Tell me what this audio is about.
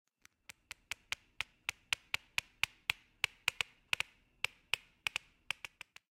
Sound 4-Edited
This sound was created by running a pencil up and down the spine of a spiral notebook. The sound's pitch has been altered.
pencil, Pitch-change, notebook, MTC500-M002-s14, LogicProX, spine